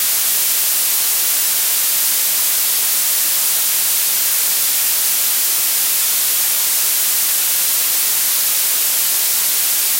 Blue Noise 10 seconds
Radio, Noise, Blue